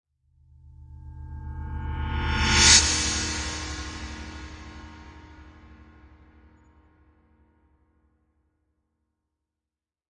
Hells Bell Hit5-Reverse
The ringing of hell's bells. Please write in the comments where you used this sound. Thanks!
bell, Blows, creepy, drama, fear, ghost, Gong, haunted, hell, horror, iron, leaf, macabre, metal, mystery, nightmare, phantom, ringing, scary, sinister, spooky, suspense, terrifying, terror, threat, thrill, witchcraft